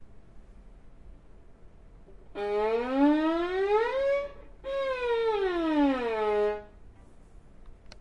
Gslide updown fast
Slide effect was created with a standard wood violin. I used a tascam DR-05 to record. My sounds are completely free use them for whatever you'd like.
arouse, climb, depressing, down, fall, falling, question, sad, slide, stretch, up, violin